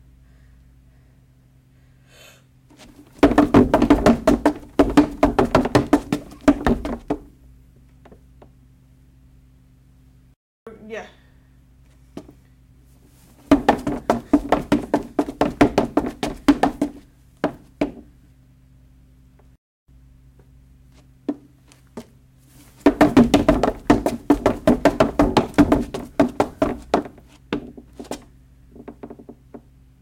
Running Footsteps on Wood Stairs
HIGH VOLUME WARNING. Foley recording of a person running up/down hardwood stairs with sneaker shoes on (specifically Vans).
step, floor, foley, foot, wood, stair, creaky, shoes, squeaking, hardwood, running, stairs, footsteps, shoe, steps, walk, run, feet